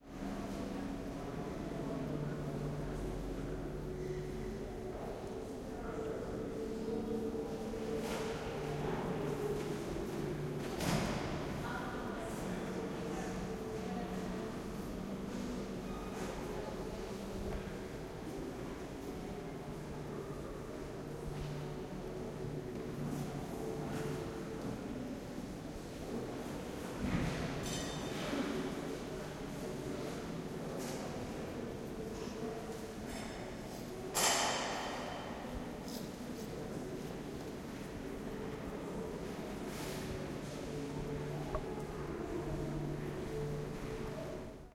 Ambience - Church Open for Tourism
Indoor ambience of a church open to tourists.
Equipment: Tascam DR-100 mkii, Peluso CEMC-6 (Cardioid cap), ORTF.
ambiance, ambience, atmos, atmosphere, background, background-sound, church